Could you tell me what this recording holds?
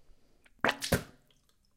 The person spit in a sink. Sound recorded by Zoom H2, Low gain. Fade in/Fade out have been introduced with Audacity sofware and normalize.